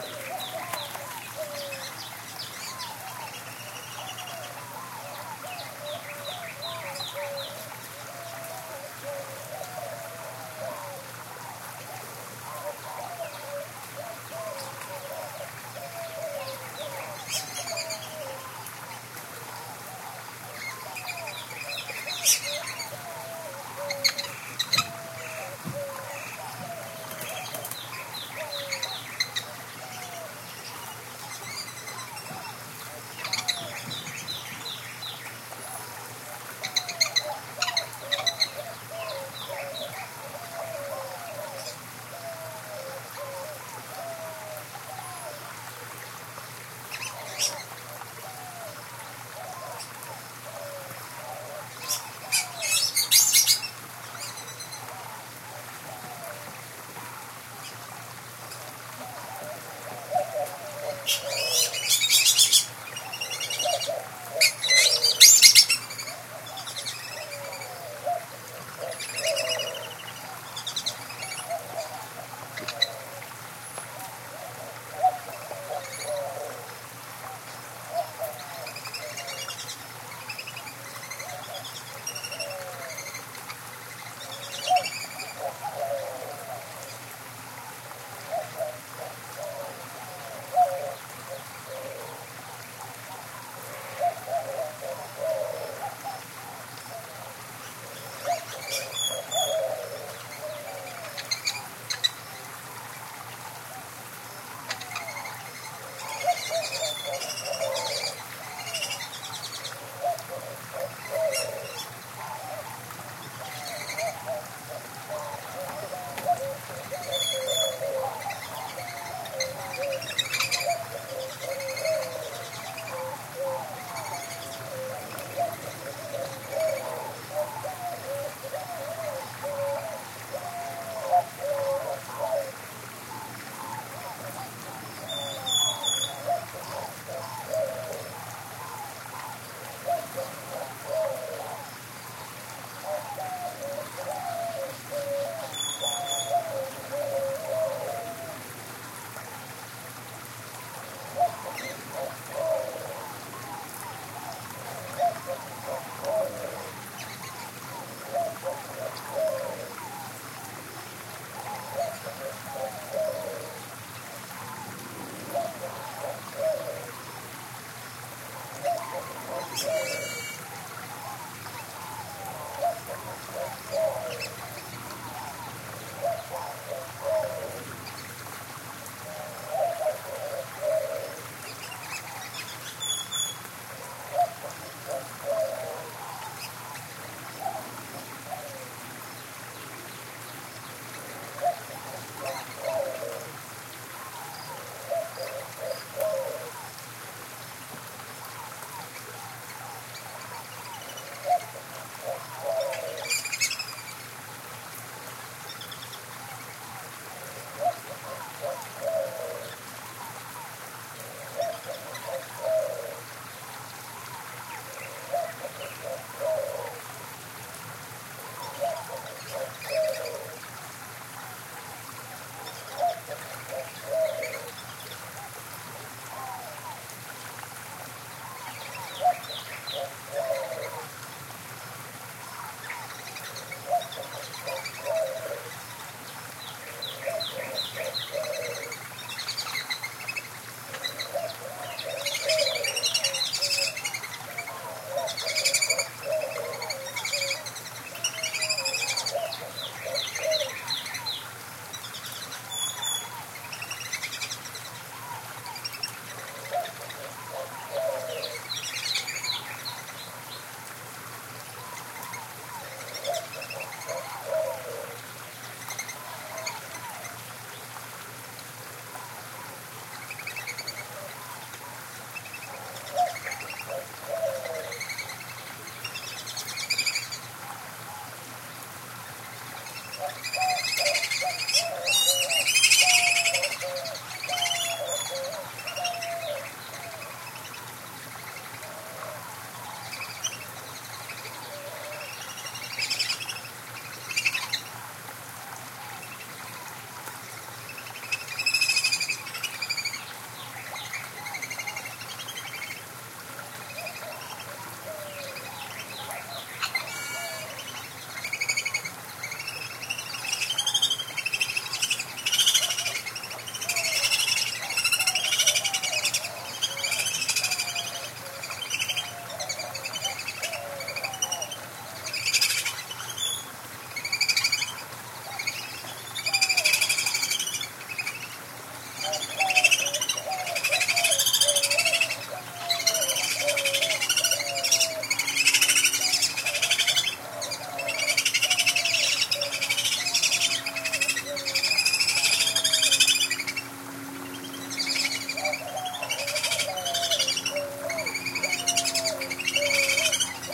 desert aviary01
Recorded at the Arizona Sonora Desert Museum. This is at the entrance to the Desert Aviary, with a small stream and many birds. The birds in this recording are: White-winged Dove, Inca Dove, Gila Woodpecker, Northern Cardinal, Great-tailed Grackle and Black-bellied Whistling-duck.
arizona, aviary, birds, desert, dove, field-recording, morning, stream, water, zoo